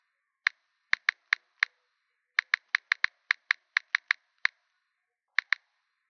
Typing sounds on iphone
message, cell, iphone, text, sms, phone, mobile, typing, telephone